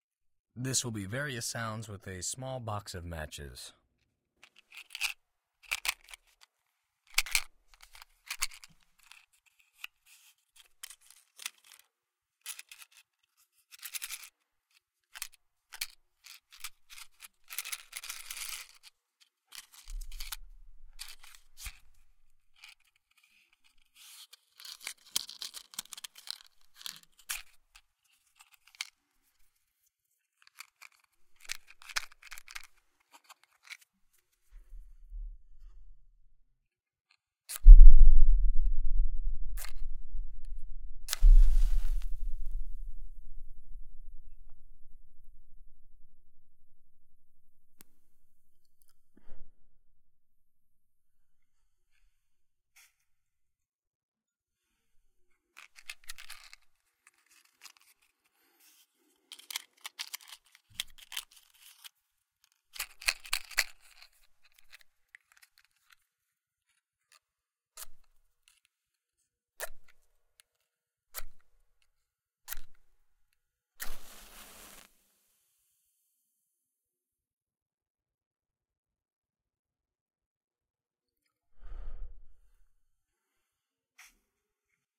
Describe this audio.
Various sounds from a small box of matches.